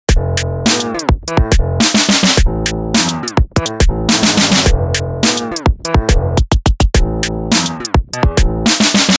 Piano drum glitchy hop loop
scratchy catchy piano drum hop loop
transition, loop, drym, scratch, glitch, piano